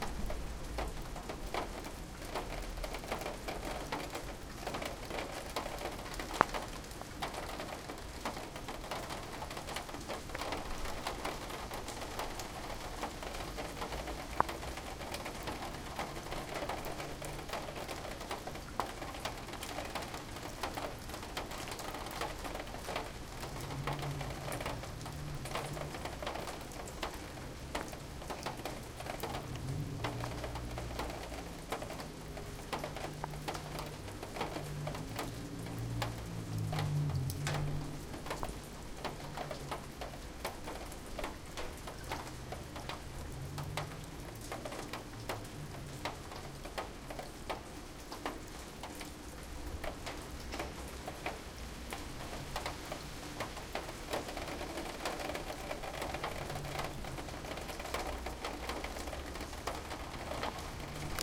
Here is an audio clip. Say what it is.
Rain falling around and through the downspout of a rain gutter in the courtyard of the apartment building where I stayed in St. Petersburg. There were 3 or 4 downspouts from which I made a total of 7 recordings. September 3, 2012, around 4 PM. Recorded with a Zoom H2.